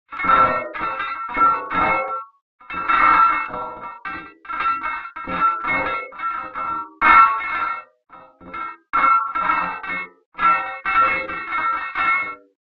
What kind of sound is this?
Metal granulation made with FFT analysis of a voice sound file.